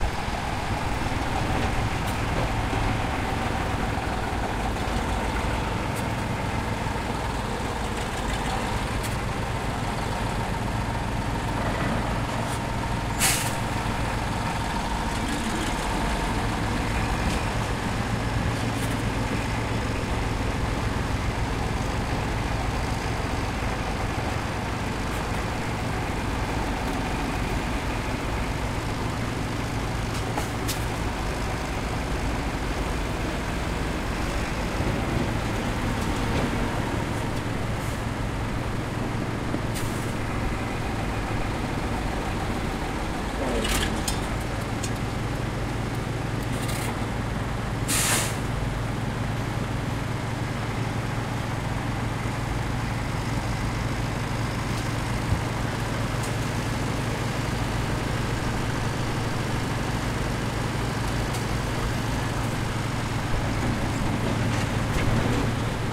20130703 water supply repair2
Water supply repair construction. Workers done their work and start tidy up. Sound of truck and tractor.
Recorded 03-07-2013.
XY-stereo, Tascam DR-40